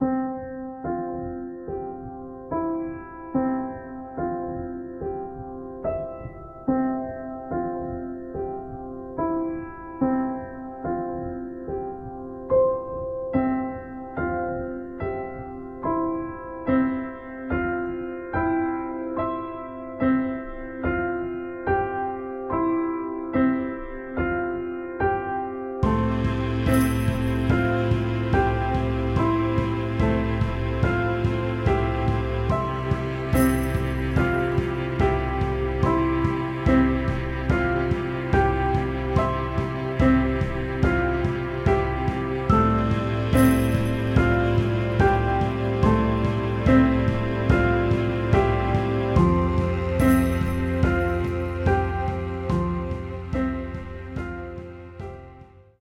Two harmonising pianos interchanging melodies with a mellotron arrangement and percussion joining in half way.